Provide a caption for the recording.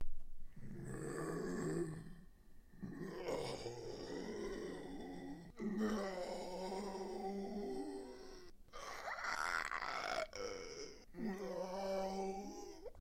Idle 2 - The Ridge - Host
Part of a screaming mutant I made for a student-game from 2017 called The Ridge.
Inspired by the normal zombies in Left 4 Dead.
Recorded with Audacity, my voice, friends and too much free-time.
alien, bioshock, crazy, creepy, fear, horror, left4dead, monster, mutated, scary, sci-fi, screaming, terror